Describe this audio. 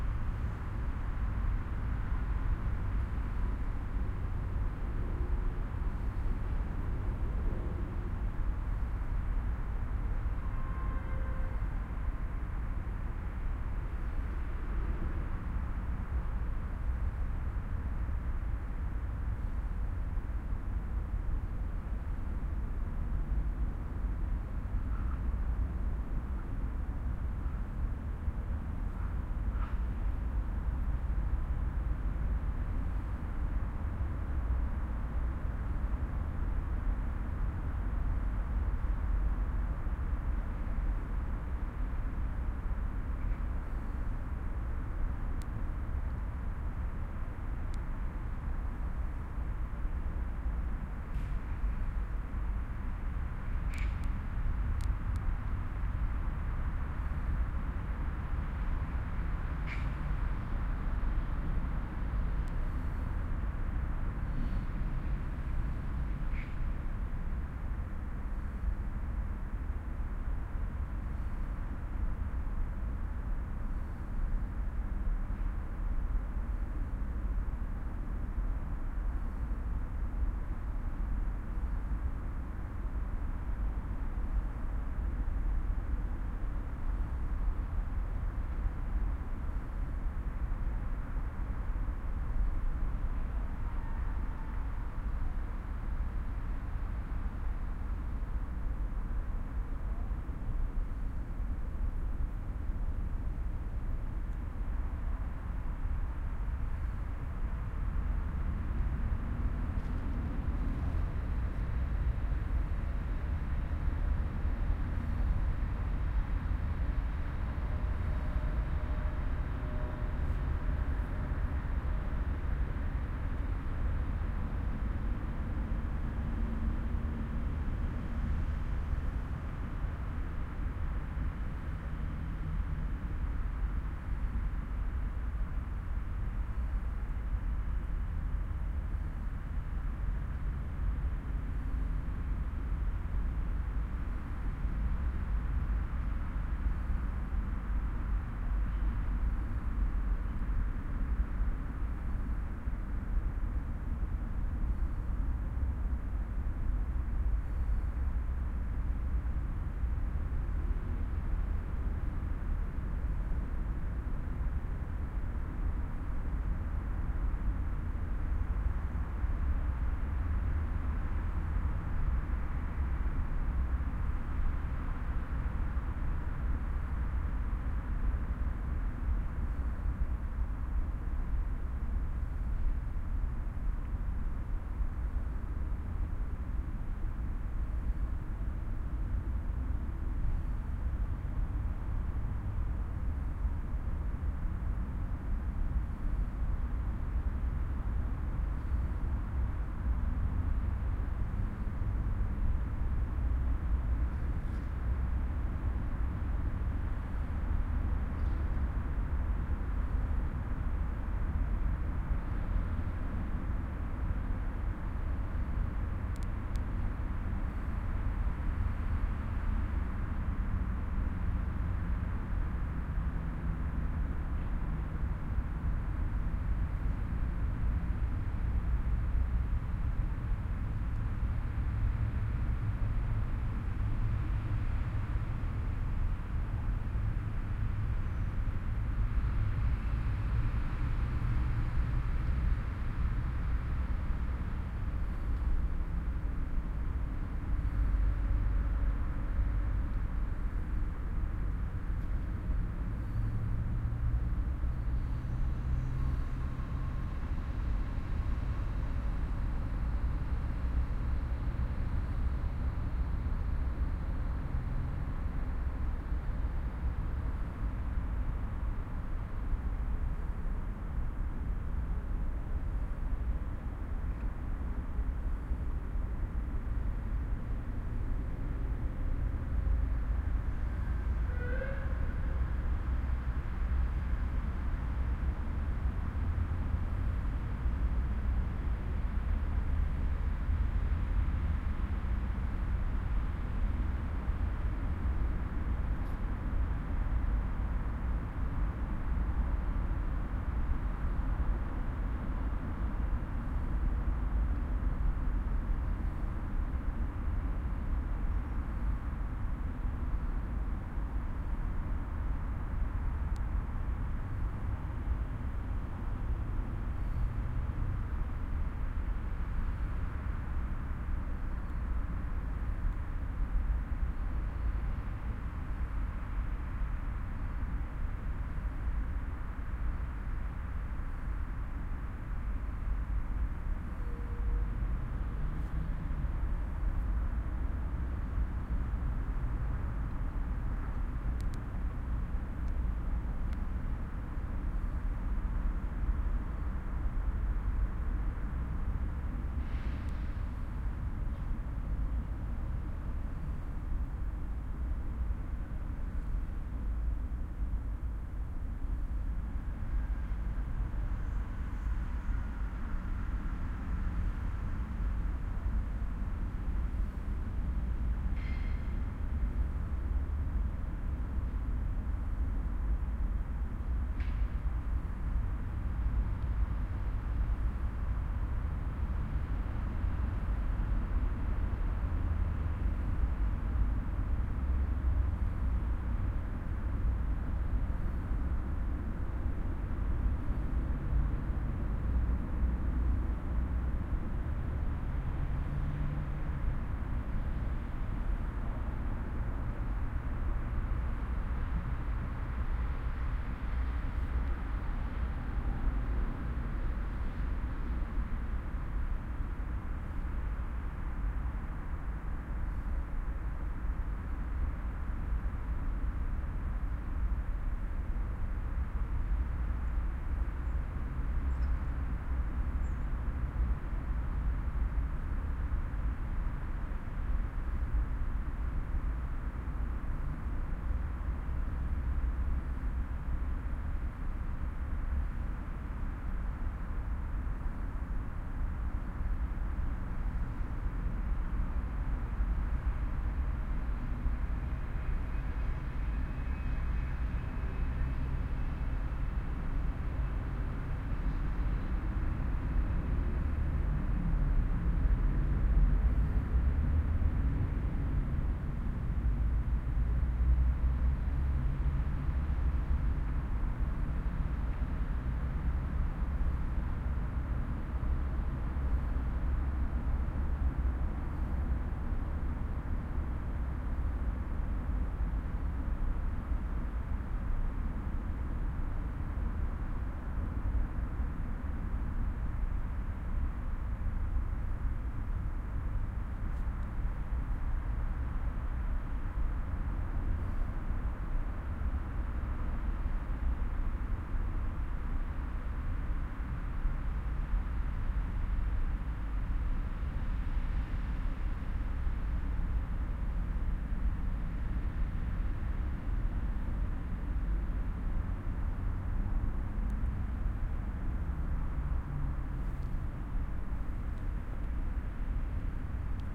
Soundscape recording made from the center of the Turia Garden in Valencia after midnight. The traffic flows around the garden and there is a constant change with the moving sounds of cars, motorbikes, some sirens, trucks.
Recorded with head-worn binaural Soundman OKM microphones.